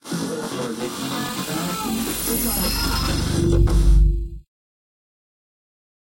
radio shudders9x
sound-effect, shudder, grm-tools, radio